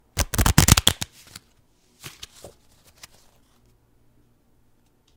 Card Shuffle
Cards being shuffled by hand
Shuffle, Sound-effect, Game